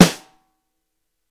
full 14" snare drum - double miked compressed and limited! Massive!!